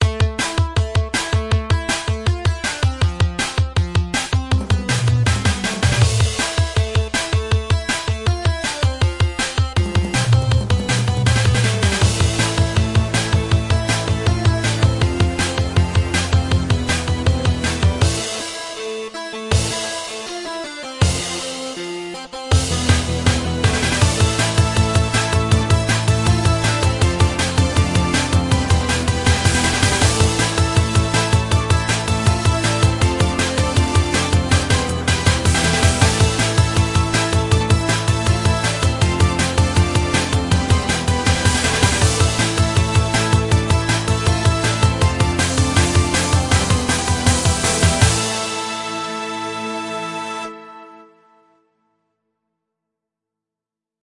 80 Synth Music 2
Little piece of music for action escene :)
Best regards!
80, action, computer, music, synth